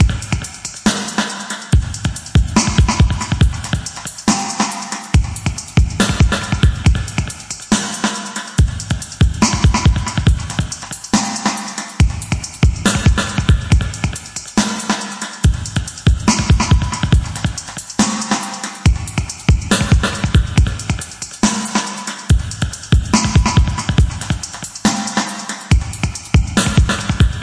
DuB HiM Jungle onedrop rasta Rasta reggae Reggae roots Roots